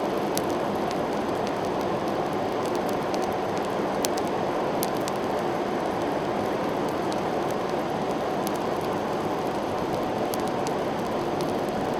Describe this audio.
Forge - Coal burning with fan on close
Coal burning is a forge while the fan is on, close.
crafts, work